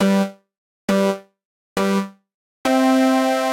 Arcade Countdown
Synthesized countdown for games.
136bpm, cart, countdown, game, synth